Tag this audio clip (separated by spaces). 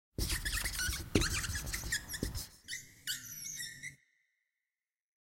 whiteboard animation scribble writing write pencil drawing dryerase pen marker squeak